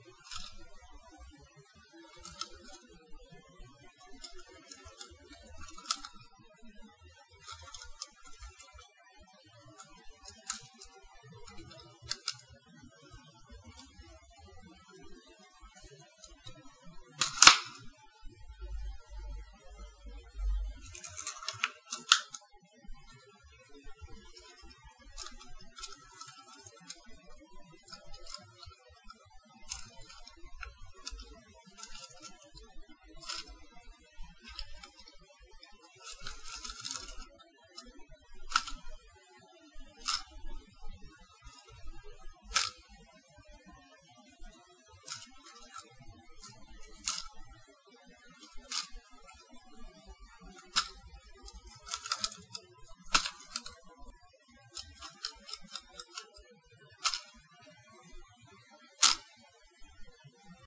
fiddling with random object2
playing around with a random object to produce generic fiddling sounds
clatter, object, random